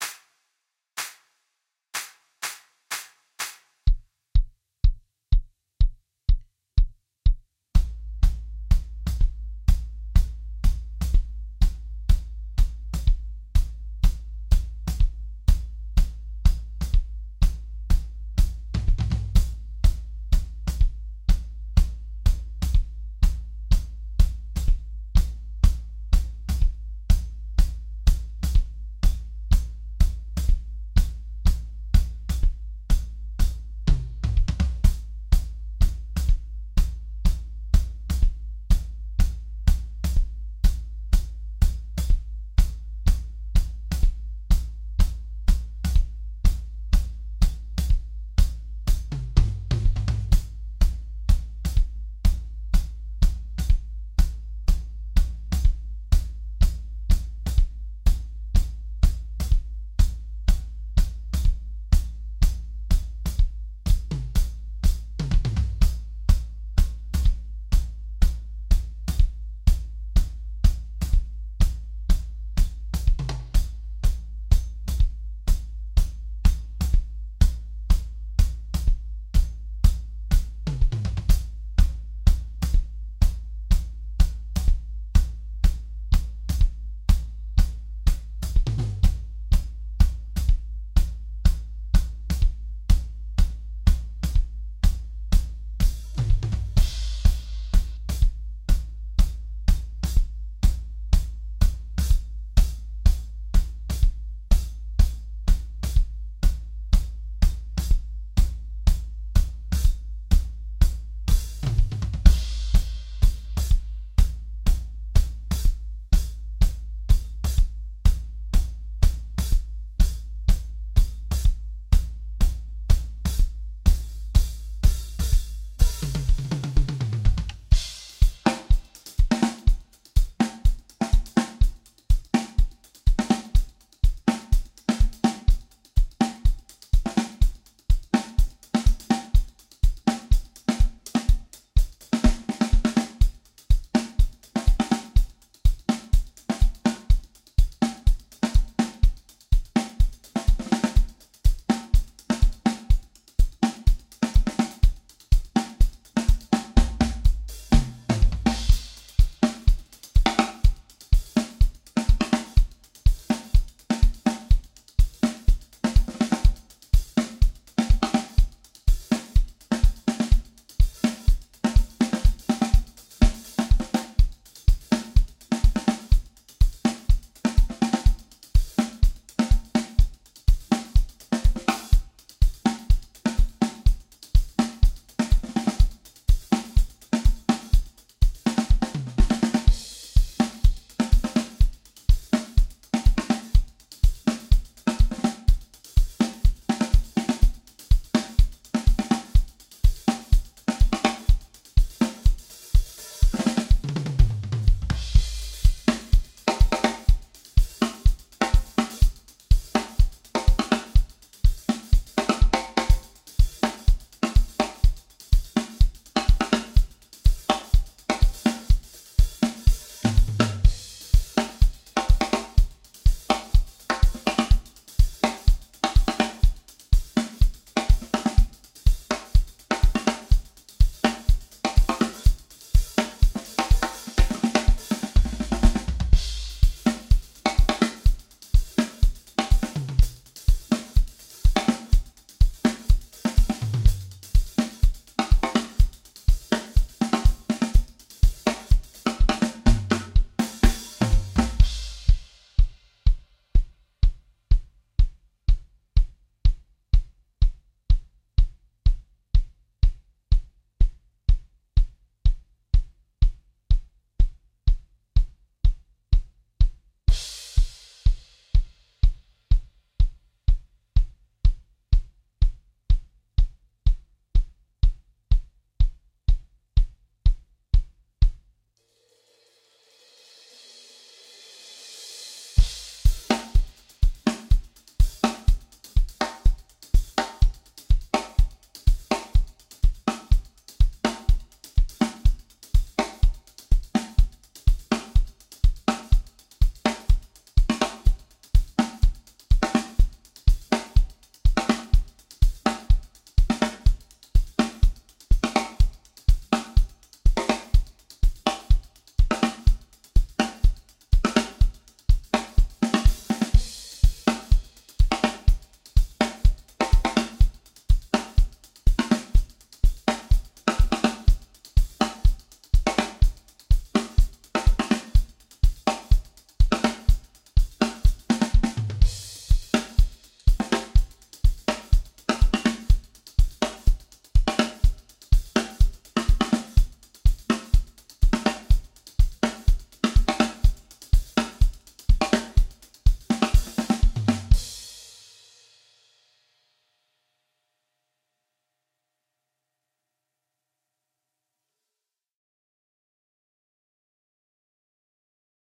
Jerusalema 124 bpm - Drum set
This is my drum set recording to the song Jerusalema by Master KG.
124bpm, drums, jerusalema, mix, song